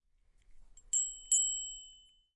small bell 1
small bell, wchich is sometimes used like calling to meal